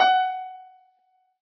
Piano ff 058